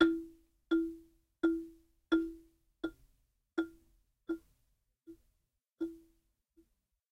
african sound 1
Some notes from an african instrument
percussion, perc, sound, african